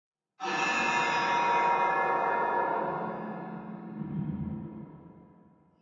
church bell
A sound created by hitting a cymbal with a drumstick, then editing it so it has some echo.
ring, bell, cymbal, chime, church, ringing